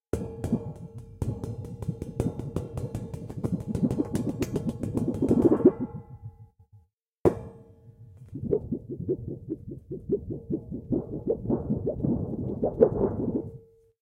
sheet metal sound three: build up
Made these sounds with a sheet of metal! Have you ever heard how crazy gibbons sound in the wild? It can sound very similar to this. Would love to know what people use it for :)
boing, cartoon, comedy, fun, funky, funny, gibbons, metal, metallic, monkey, ridicule, sheet, sheet-metal, sound-design, sound-effect, womp, wonky